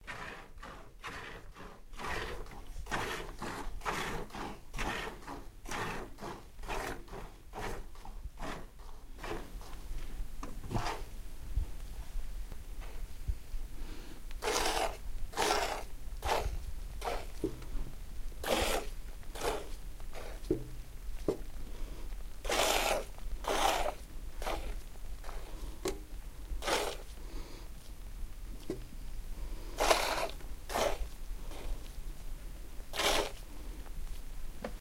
Milking the goat by hand in village